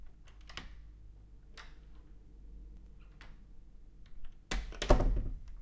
An office door (as in the door to a specific employee's office) being opened and closed. Doors ftw.